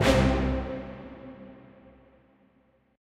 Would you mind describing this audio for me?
⇢ GREAT Synth 2 C
Synth C. Processed in Lmms by applying effects.